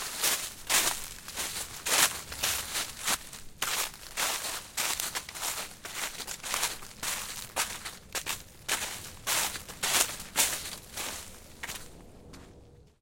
steps on leaf 16
Recorded on March in Madrid close to Jarama river.
Recorded with a Rode NT4 on a SoundDevices 702.